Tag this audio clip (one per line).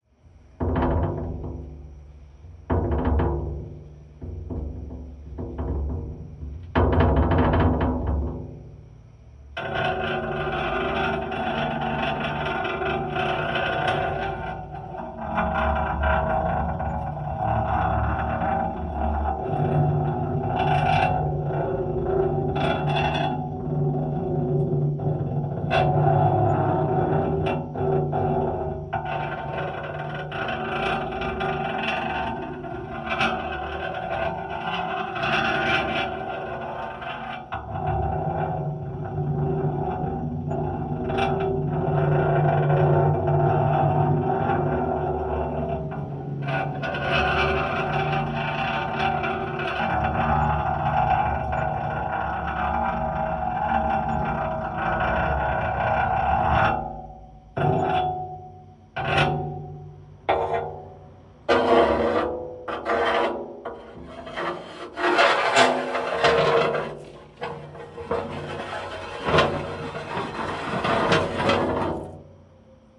field-recording
kimathimoore
mgreel
morphagene